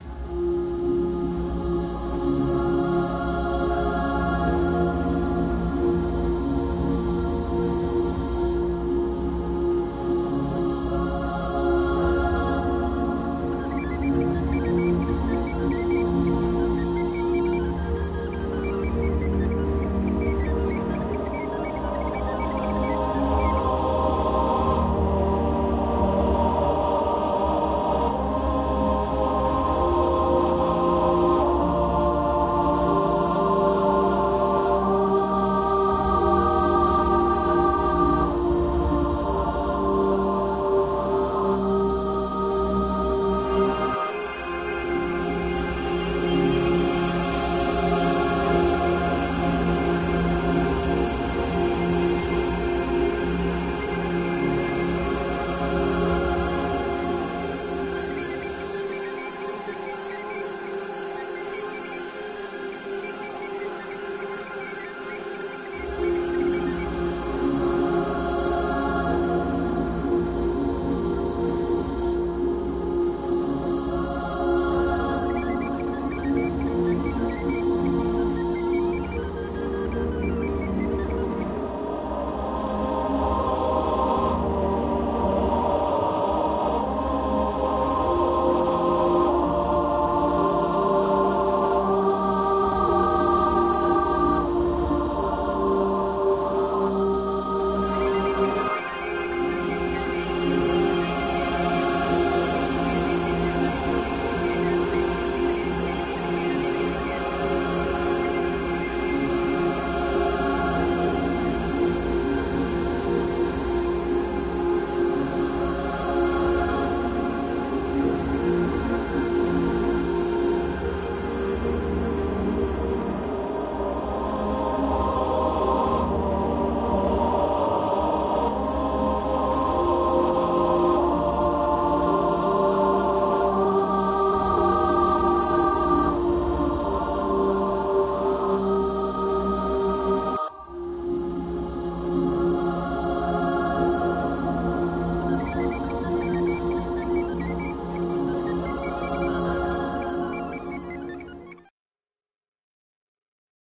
Action4ME mixdown
Many thanks to the creators of
atmospheric, calm, chillwave, distance, euphoric, loop, melodic, pad, polyphonic, soft, technology, warm